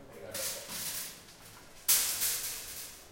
Firstly an old man puts the shopping cart in the row of carts and little later, another guy, younger than the first one, is removing another cart of another row. The supermarket is a Carrefour in Barcelona.
carrefour, metal, shopping, shoppingcart, supermarket